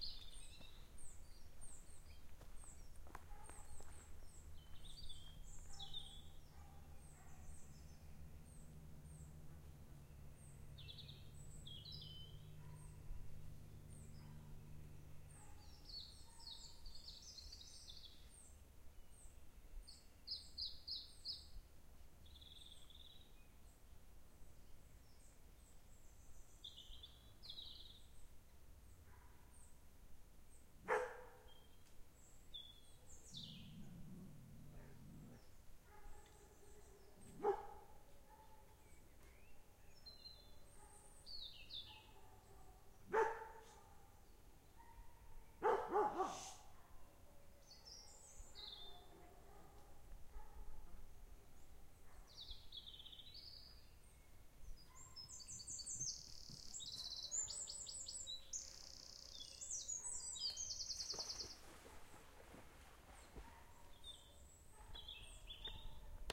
amb - cecebre 07 chu
trees, forest, amb, ambience, birds